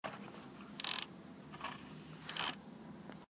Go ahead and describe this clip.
scroll-up-scroll-down
A scrolling sound of a cheap mouse. Could pass as a DIY kind of sound
mechanical, mouse, mouse-wheel, scroll, twist, wheel